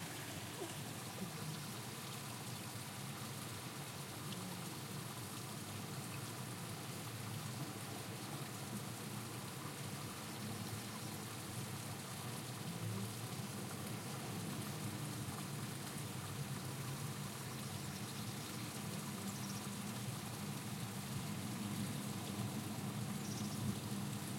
little string of water and birds
h4n X/Y
water, bird